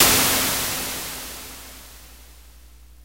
Fm Synth Tone 06